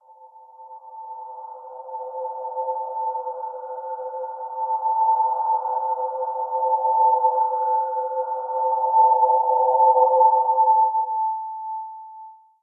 an ominous drone